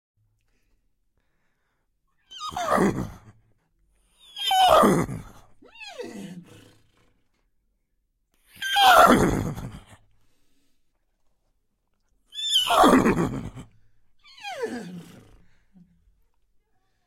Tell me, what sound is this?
Horse whinnying 1
The Shure SM58 Dynamic Microphone and NEUMANN TLM 103 Condenser Microphone were used to represent the neighing of a horse that was made by humans
Recorded for the discipline of Capture and Audio Edition of the course Radio, TV and Internet, Universidade Anhembi Morumbi. Sao Paulo-SP. Brazil.
UMA, WHINNYING, HORSE, 5MAUDIO17, HANDSOME